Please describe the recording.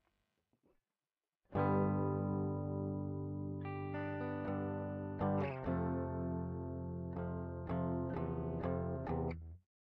Clean Guitar
A small played Gmaj to Fmaj chords using my Orange Tiny Terror with a Wharfedale DM5000 about an inch away and inch to the right of the cone.